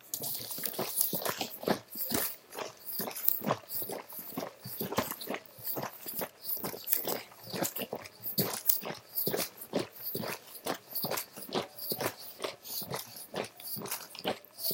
Shaking Listerine
class, sounds